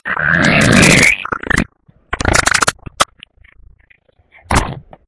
Gassy Fart
butt, fart, gas, gross, poop